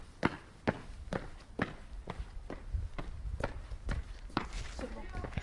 sonicsnaps LBFR Bakasso,Bryan[7]
Here are the recordings after a hunting sounds made in all the school. Trying to find the source of the sound, the place where it was recorded...
Binquenais, La, Rennes, sonicsnaps